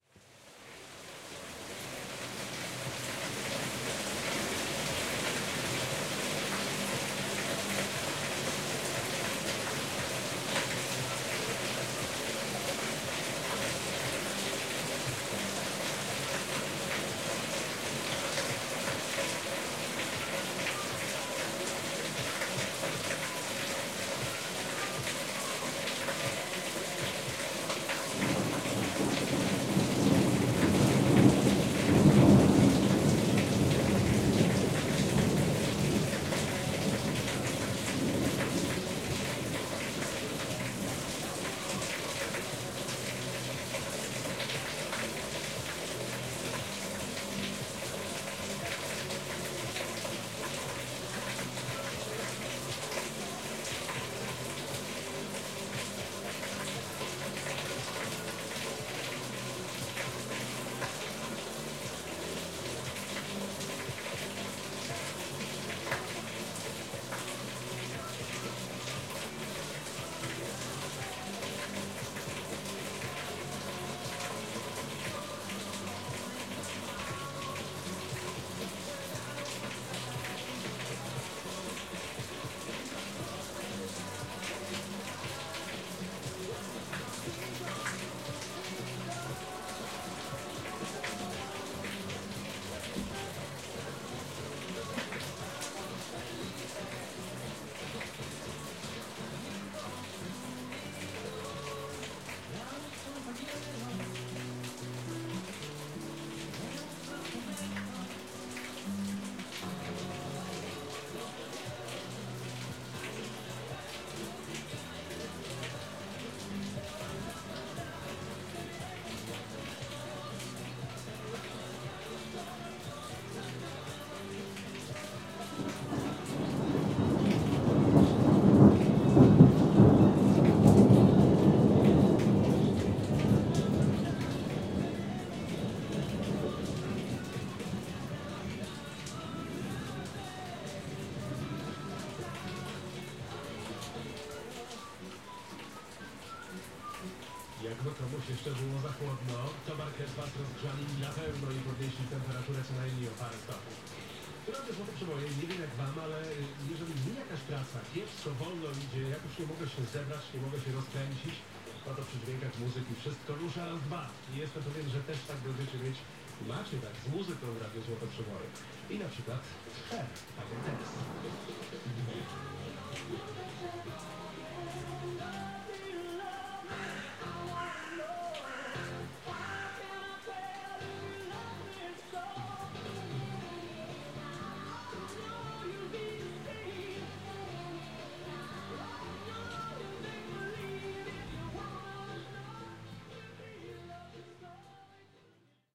180510-thunder Harenda
10.05.2018: field recording from restaurant Harenda located in Ludwikowice Kłodzkie (Lower Silesia in Poland). The end of the thunder and rain. No processing, recorder zomm h4n+internal mics.
Lower-Silesia, rain, thunder, Poland, restaurant, field-recording, radio, fieldrecording